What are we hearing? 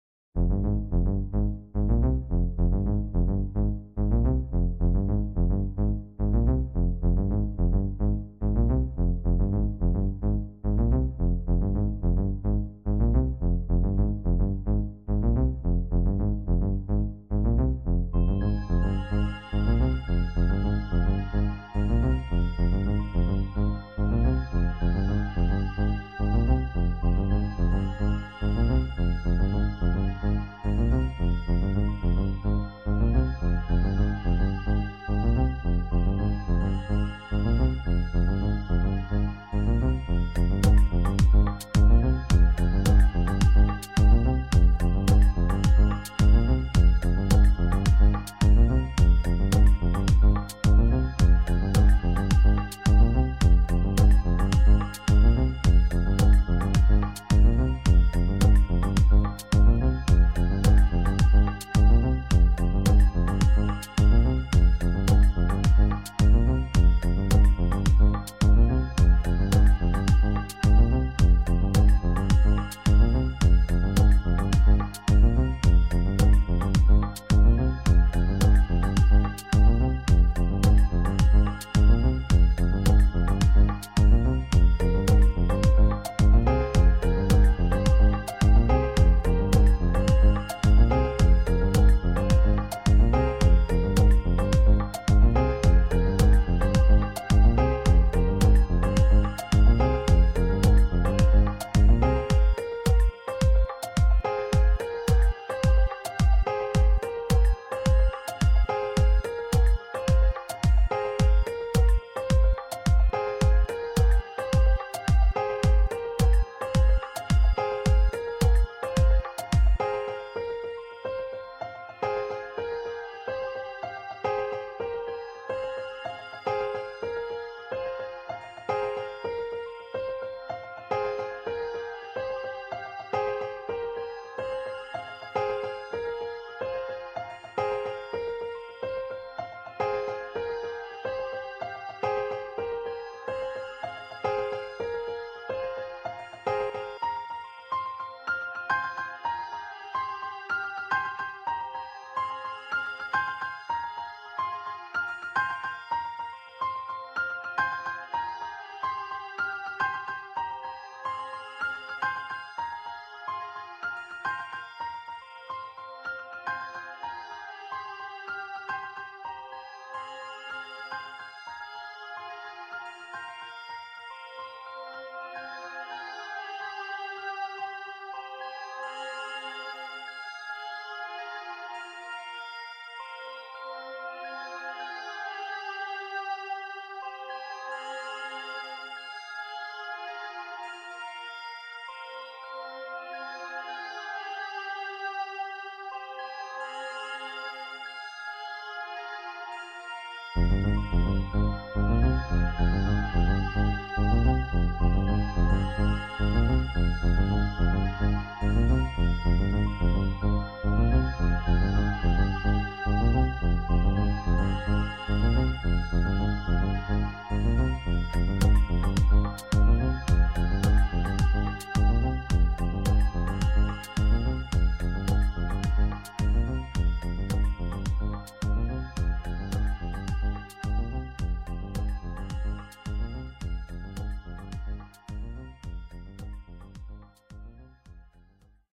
Tentacle Wedding
This one is from alien wedding i was on yesterday, lots on tentacles and hot toddy
alien, astro, cosmos, dream, edm, effect, fun, future, groovy, idm, laser, movie, music, science, sci-fi, soundtrack, space, spaceship, tension, wedding, weird